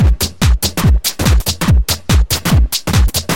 duppyHouse04 143bpm
Funky bouncy house/dance beat with overlaid squelchy crunchy drums.